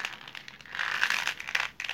38-raices creciendo
fast, roots, trees